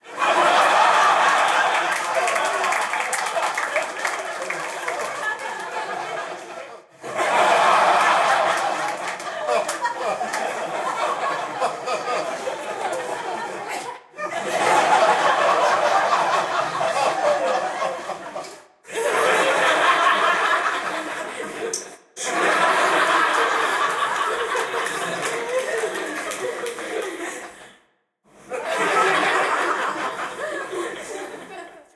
Comedy FX
Some laughter recorded from two venues in Edinburgh
Enjoy.
Evil Ear
audience, canned, comedian, comedy, funny, giggle, humor, laugh, laughing, laughter, standup